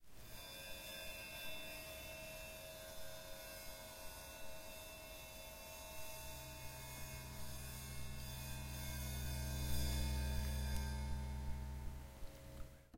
Violin Bow on Cymbal, A
Raw audio of scraping the horse-hair of a violin bow against the rim of a 14'' cymbal. You can hear some natural resonance at the end of the clip.
An example of how you might credit is by putting this in the description/credits:
The sound was recorded using a "H1 Zoom V2 recorder" on 7th May 2016.